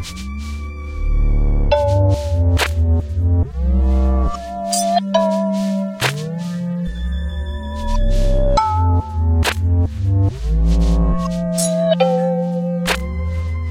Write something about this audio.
experimental electronic beat
A 70bpm beat/loop sequenced using Renoise 3.0. Samples were either created from scratch or modified after being recorded in my basement using the sampler built into Renoise. Sounds good in reverse too.